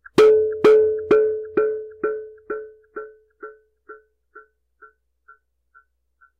CS Magn Obj 2 spr 2 bands Samples 6
Hits from a contact mic instrument with 2 rubber bands and 2 springs.
noise, spring, contact